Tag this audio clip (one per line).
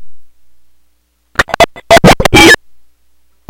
rythmic-distortion
glitch
murderbreak
coleco
core
bending
circuit-bent
just-plain-mental
experimental